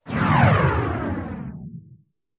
Jet Whoosh
A sweeping aircraft or rocket type whoosh.